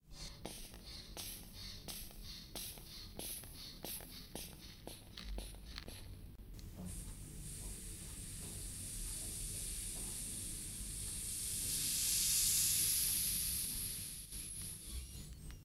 QUENTIN Agathe 2013 2014 son1
blood pressure monitor's mono record at the infirmary with a dynamic microphone. This sound has been modified with a software : Audacity.
Effets : Changement de tempo, tremolo
Typologie
itération complexe
Morphologie
Masse : son non continu et complexe
Timbre : terne
Grain : rugueux
Allure : vibrato seulement à la fin
Dynamique : attaque douce
Profil mélodique : pas de hauteurs ne s’entendent particulièrement dans le son
Calibre : pas de filtre
squeeze, medical